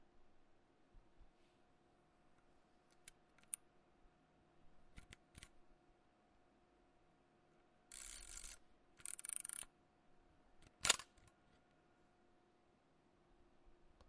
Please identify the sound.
Old camera taking a photo
Taking a photo with an old camera
camera, old